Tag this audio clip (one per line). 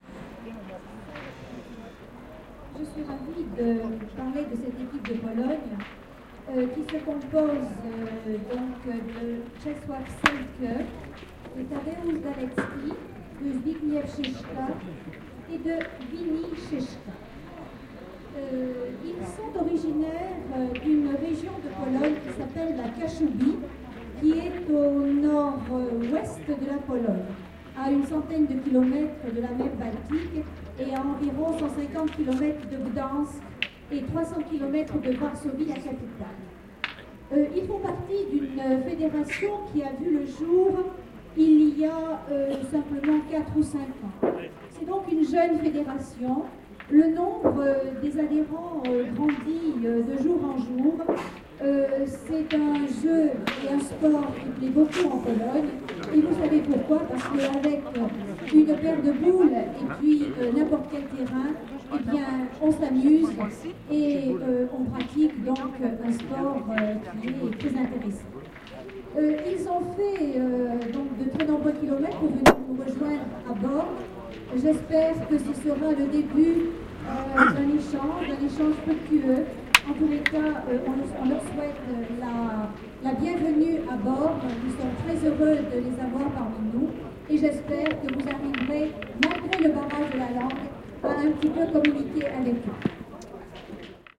ambience
atmosphere
boules
field-recording
france
speech